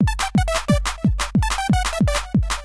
GARAGEBAND LOOP 001
Loops from clippings of songs I made in GarageBand. This one has fast rhythm and melody.
beat, garageband, loop, song, drums, music